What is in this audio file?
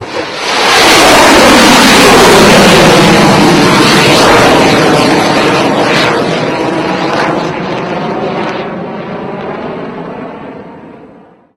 BGM-109 Tomahawk Land Attack Missile Launch.